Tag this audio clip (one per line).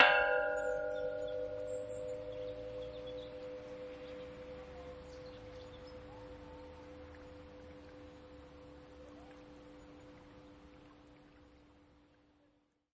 ambient
bird
birds
birds-in-the-background
clang
field-recording
kielder
metal
metallic
nature
pole
sonorous
water